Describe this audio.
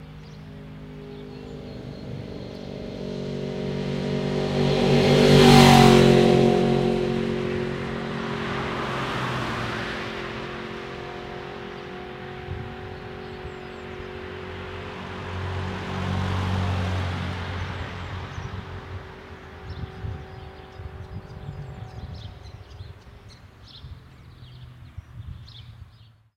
A passing motorcycle. Recorded with a Behringer ECM8000 omni mic.